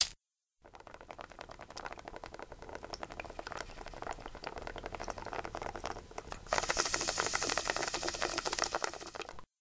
A recorded bong rip
Bong, marijuana, pot, rip, weed